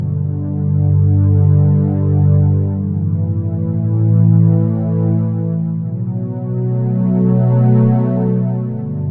Some chords played on a friendly synth pad patch from my Nord Modular. Hopefully it will loop smoothly.